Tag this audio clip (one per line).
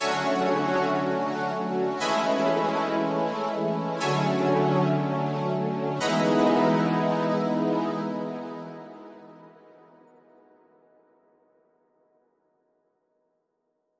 Chords
Reason
Synth